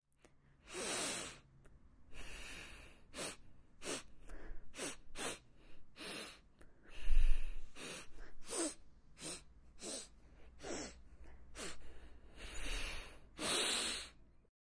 Recording of someone sniffing\ breathing in through their nose.